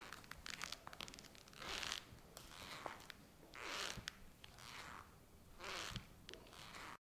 A girl with a pair of leather boots, sat and moved her feet inside of her shoes to produce the sound.
A Zoom H6 recorder was used, with the XY capsule, inside a house.